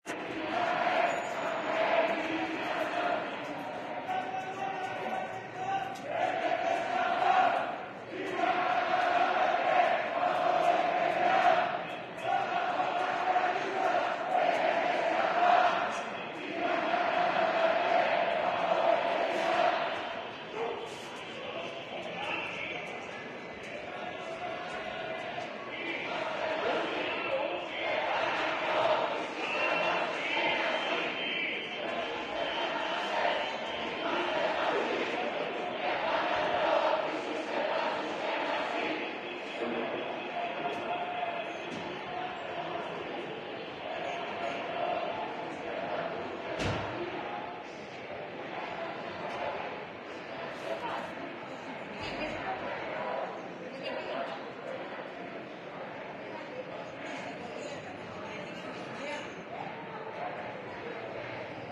Athens-Street-Protest-Coronavirus-Lockdown-May-2020-20-39-51
Sounds of the crowd demonstrating against lockdown measures, in Kypselis, a municipality of Athens. May 2020, recorded with mobile phone.
protest, crowd, street, field-recording, city